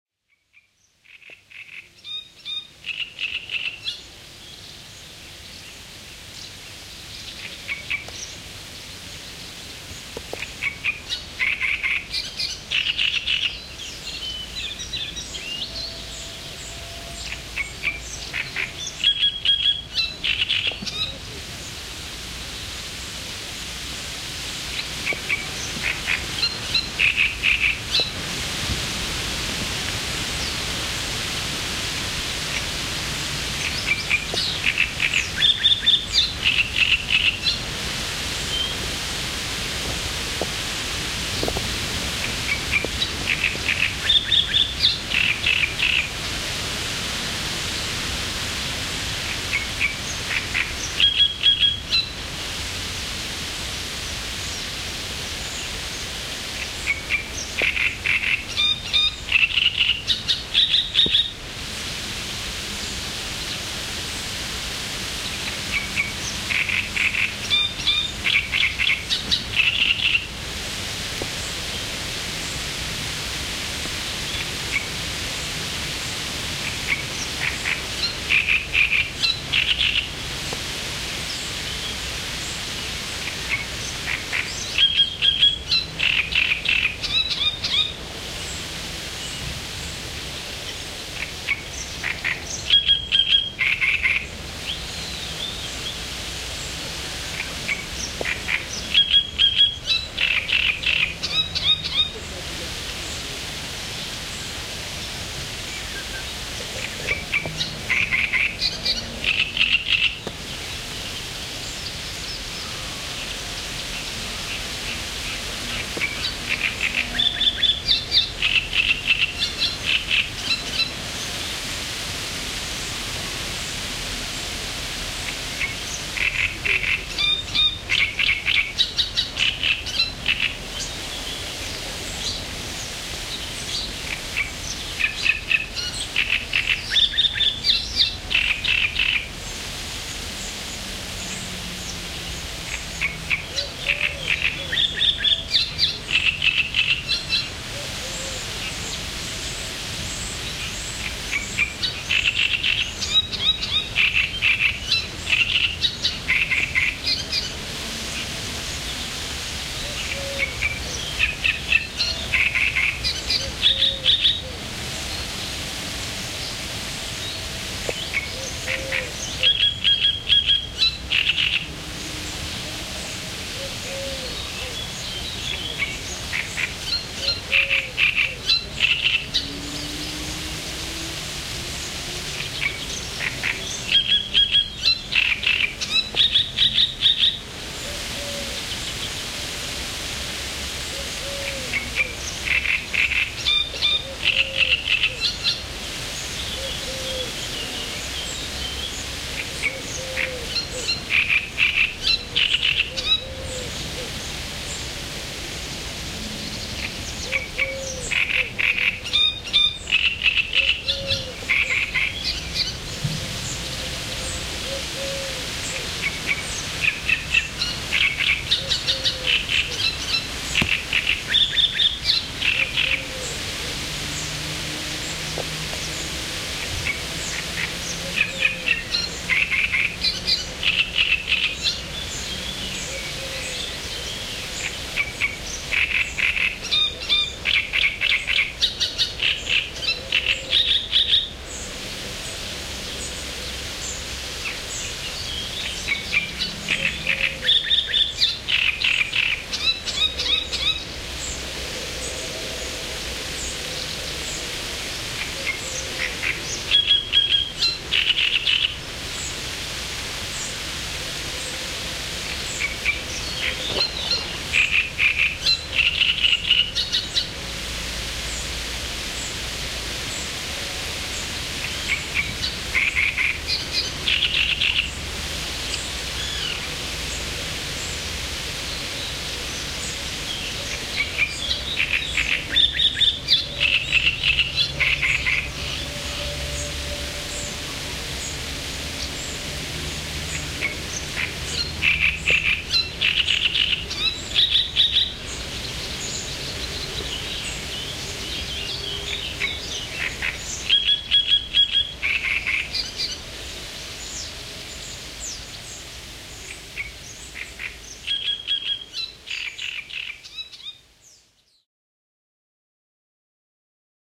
Jamalowa działka birds01
Field recording R09 and UZI microphones, just some morning ambience at my friends country side garden near little lake.